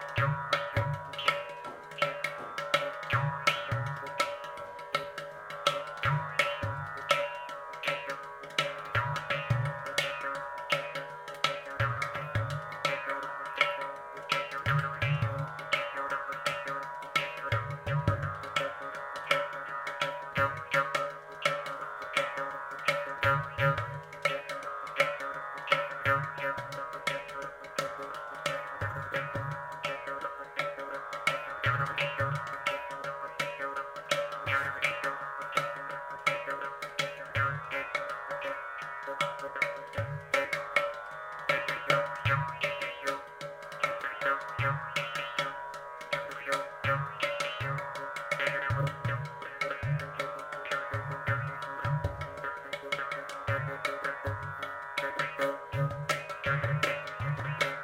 Ghatam-Morsing-Improvisation
A short on the fly rhythmic improvisation on Ghatam and Morsing. It starts out on a duple meter and slowly moves to a triple meter.
Ghatam, Morsing, Indian-percussion, Carnatic-music, CompMusic, Mouth-harp